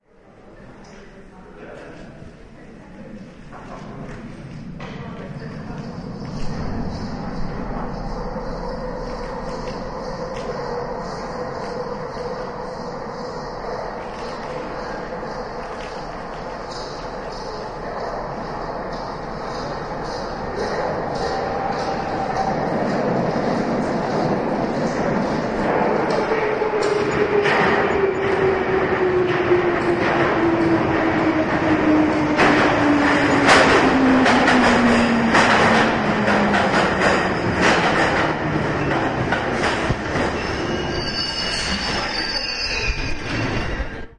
London Underground Victoria Line 1967 Stock arrives at Highbury & Islington station